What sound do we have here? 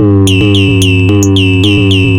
110 bpm FM Rhythm -15
A rhythmic loop created with an ensemble from the Reaktor
User Library. This loop has a nice electro feel and the typical higher
frequency bell like content of frequency modulation. An experimental
loop with a broad frequency range. The tempo is 110 bpm and it lasts 1 measure 4/4. Mastered within Cubase SX and Wavelab using several plugins.